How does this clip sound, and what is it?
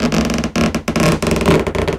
bln rub lng 02
Rubbing a balloon with the hands. This is a cropping of a sounds from pitx's "Globo" balloon samples. Normalized in ReZound. The original description: "It's the sound of a balloon flonded with the hand. Recorded with Shure 16A plugged in the PC. Sonido de un globo cuando se le pasa la mano por encima. Grabado con un micrófono Shure 16A enchufado en el PC."